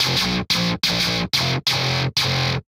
90 Atomik Guitar 09
fresh grungy guitar-good for lofi hiphop
sound, hiphop